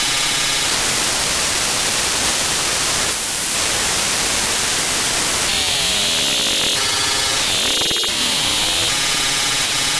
funky static
a harsh noise, sounds like digital static, with some flanging